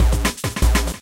Just a Misc Beat for anything you feel like using it for, please check out my "Misc Beat Pack" for more beats.
Beat, Idrum, Misc